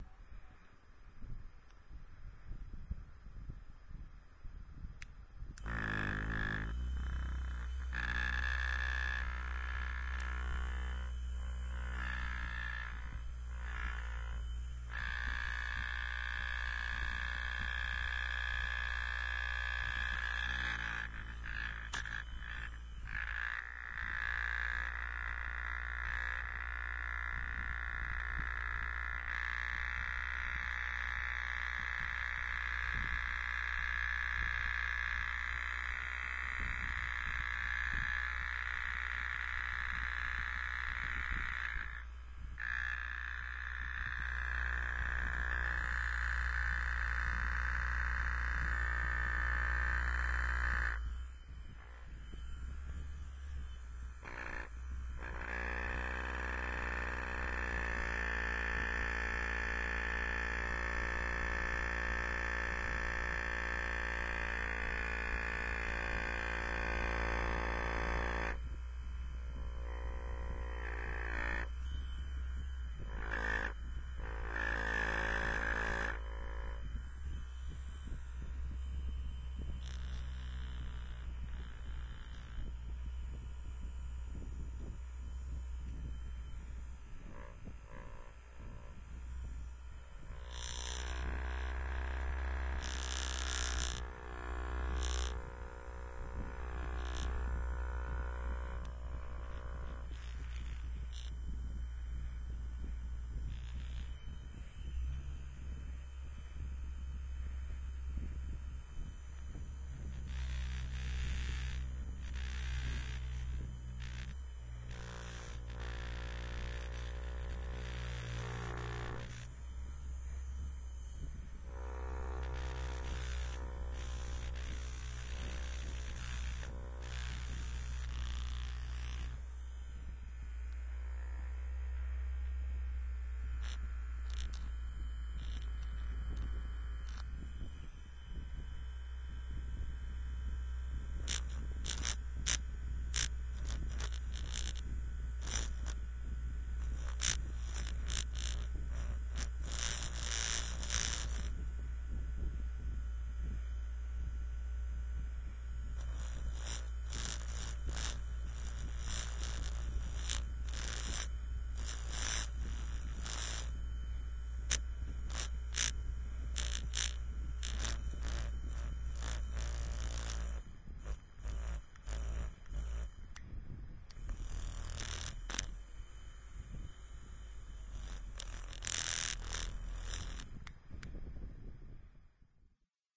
this recording was recorded with a sylvania smp1015 mp3 player
the sound here is of a usb neck massager that was vibrating on my shoulder you can hear the various intensity of the motor as i putted more pressure on my shoulder
the sound was amplify in awave studio and cut and prepared in cool edit